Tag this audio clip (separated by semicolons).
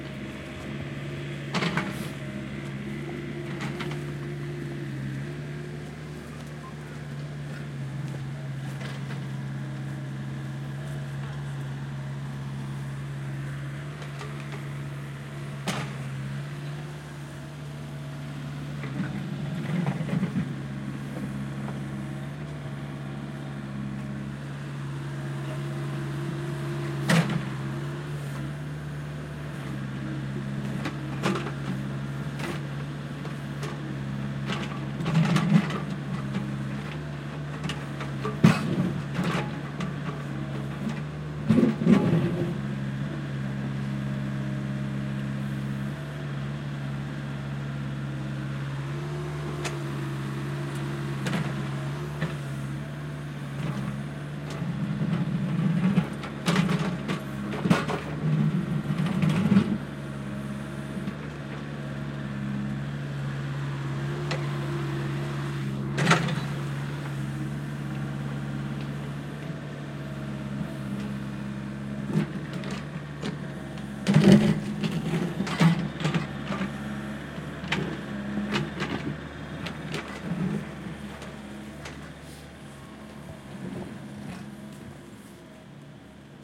excavator; work